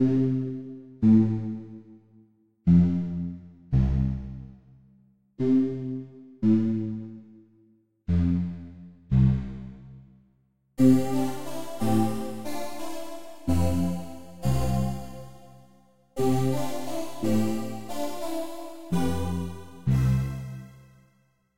Crystal Caves (Loop)
cold, loop, keyboard, game, keys